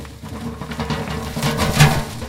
rocks rolling with metal rumble 5
Foley SFX produced by my me and the other members of my foley class for the jungle car chase segment of the fourth Indiana Jones film.
metal, rocks, rumble, rolling